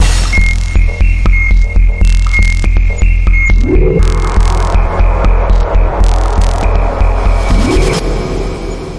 SemiQ intro 10
This sound is part of a mini pack sounds could be used for intros outros for you tube videos and other projects.
effect, experimental, soundscape, soundeffect, sfx, effec, intro, pad, sci-fi, delay, sound, sound-effect, sound-design, deep, fx